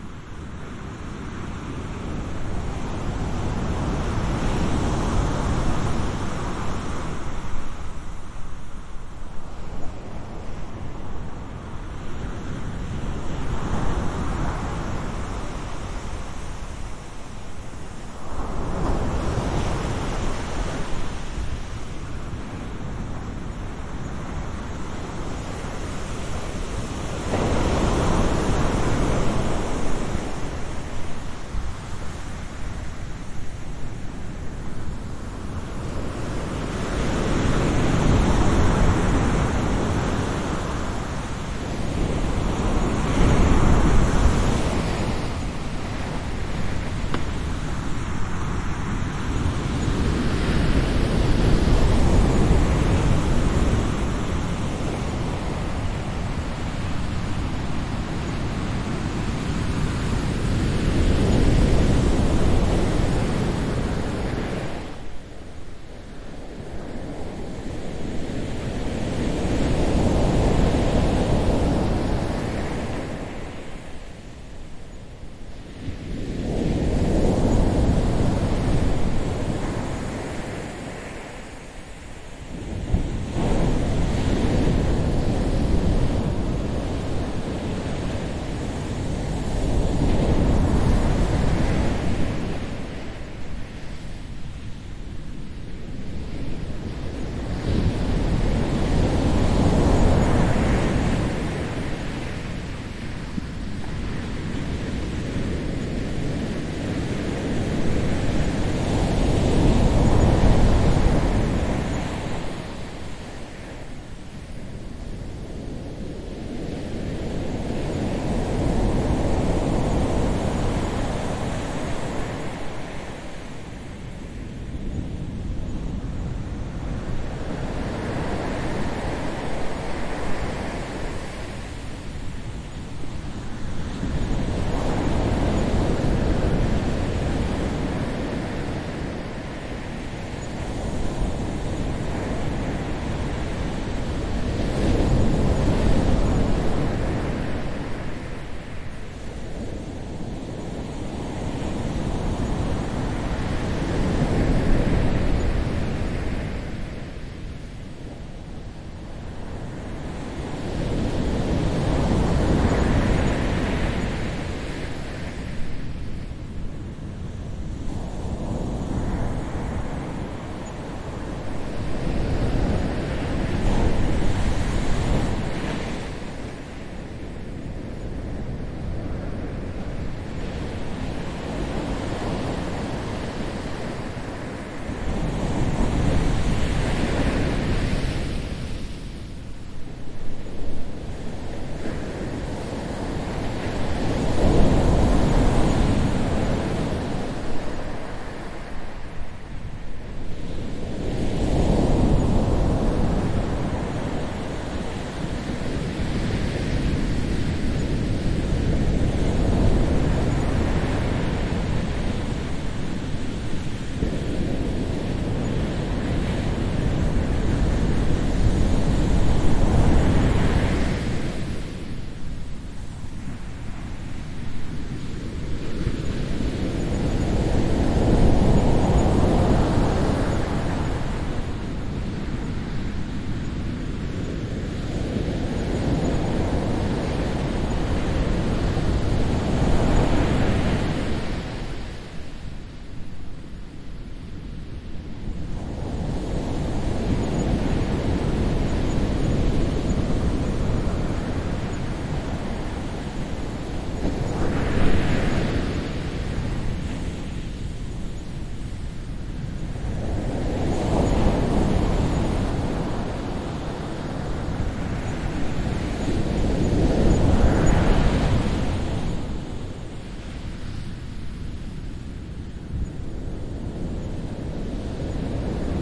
porto 22-05-14 large waves during a storm, wind
Breaking waves in a stormy day with wind, sand beach
atlantic, beach, binaural, field-recording, ocean, rock, sand, sea, sea-side, spring, storm, surf, tide, water, wave, waves, wind